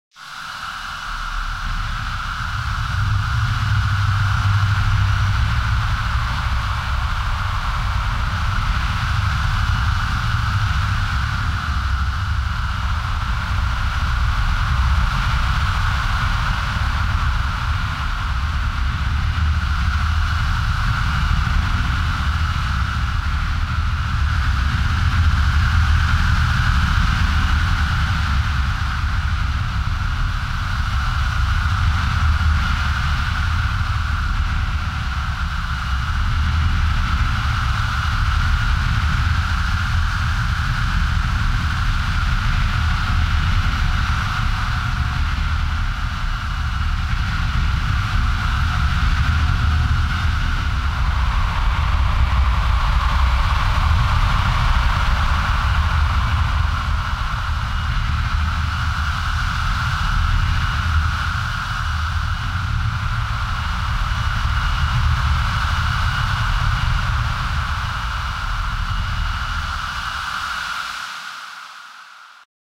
Again a feedback loop generated by my mixer and a Boss digital delay
and played through my inexpensive speakers. Recorded with a SM57 to
Minidisk.
Sound file was processed in Soundforge. I pitched the file down and
added some reverb. Used the equalizer to emphasize certain frequencies and copied some fragments and layered them.
You
hear a hard blowing high frequency wind, that's blowing very steady.
Also there's some low frequency rumble. The hiss is quite sharp.